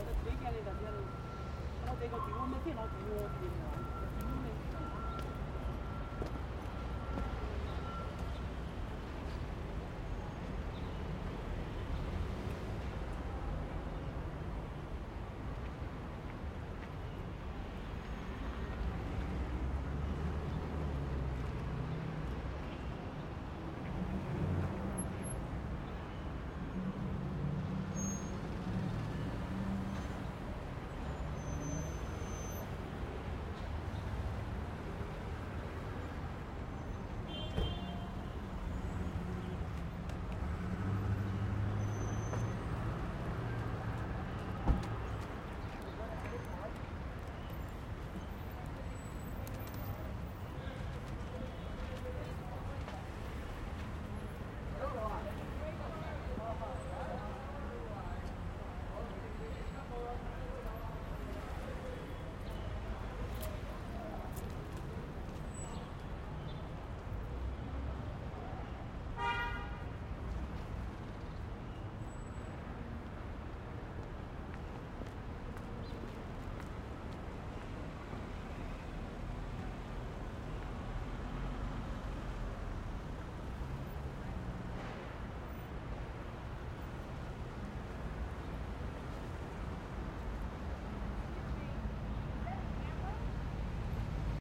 Chinatown Sidewalk noisy
busy traffic in china town